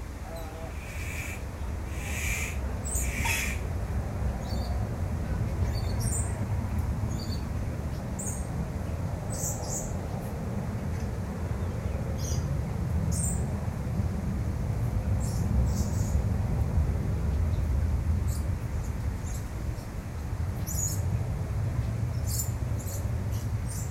Calls from a Superb Bird-of-paradise, with other birds in the background. Recorded with an Edirol R-09HR.